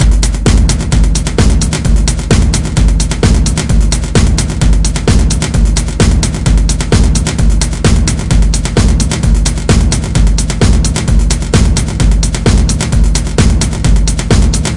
straight reason pattern i created.
greetings from berlin city,germany!
drum, drumloop, drums, electro, loop, reason, sequence, synthetic, tekno, trance